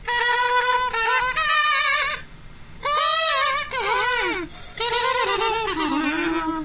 Kazoo Virtuoso Cartoon Kevin in front of a cheap Radio Shack clipon condenser with studio effects, awesome!